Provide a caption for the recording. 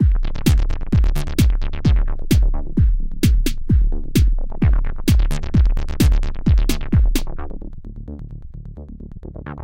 Synth Arpeggio Loop 18 - 130 bpm
Synth Arpeggio 01
Arpeggio Loop.
Created using my own VSTi plug-ins